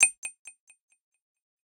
Picked Coin Echo
accomplishment, accurate, acquired, alert, bonus, coin, collected, correct, happy, item, level, notification, points, positive, reward, score, selected, success, up, victory, win